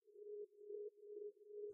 alarm-bonus
alarm, bonus